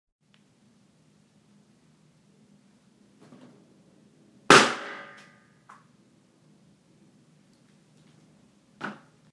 Bursting ballon in a Square-like master living room with dry walls
ballon, ballon-bursting, Balloon, Bang, bursting, dry-walls, living-room, Pop, popping